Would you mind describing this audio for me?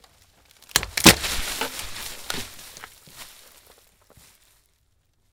wood snap and dirt slide
Foley SFX produced by my me and the other members of my foley class for the jungle car chase segment of the fourth Indiana Jones film.
dirt, slide, snap, wood